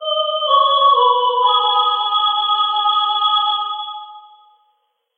synthchorus AH D# C# B G#
Synthetic Chorus_AH_D#_C#_B_G# Stereo
synthetic, sequence, short, chorus, voices